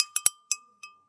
Ceramic Bell 03
drum, chime, percussion, percussive, rhythm, metal, bell, groovy, ceramic